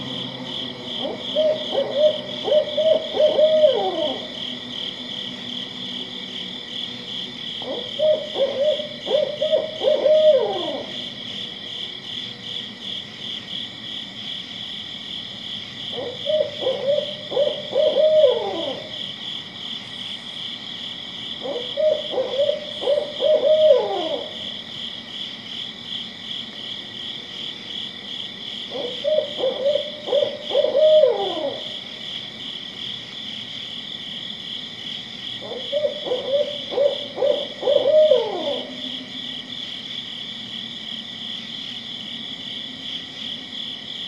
Heard a Barred Owl calling in my backyard. Grabbed my Zoom H4n with Audio-Technica AT897 shotgun mic and started recording. Did some minor equalization to soften the higher frequencies and roll off the low end.
Crickets are chirping in the background, a few other insects make some sounds. Soft droning of a couple planes flying by and vehicles from a highway about a mile away, but do not overpower the Barred Owl.
H4n, Zoom, Audio-Technica, barred, owl, night, bird, Barred-Owl, AT897, nature